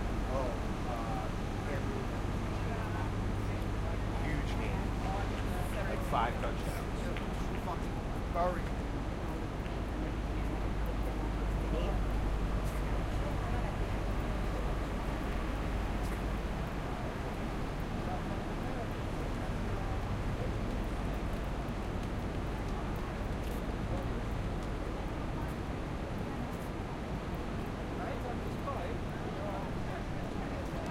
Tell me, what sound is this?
Short street recording on Wall Street
street-noise, city, street, field-recording